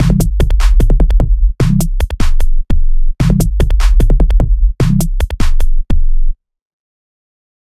c sine ascending descending tom with hard drum snare hihat 150 bpm
drum loop with c sine bass.
bass, c, chord, drums, grime, sine